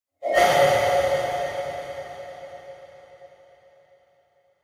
resonating sound with no attack, used to announce a title of a place